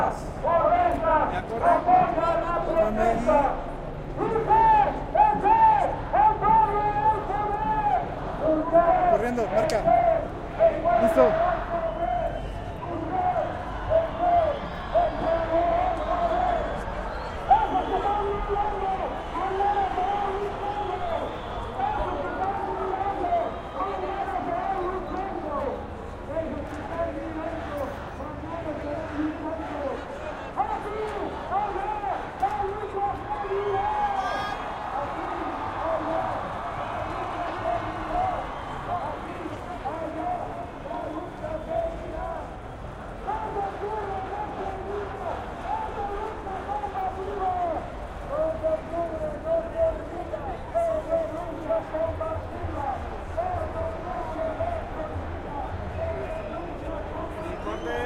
ENTREVISTA-2-T026 Tr5 6
a mob ambient in mexico to commemorate the killed students in 1968... streets, crowd, students, people, mexico, everything in spanish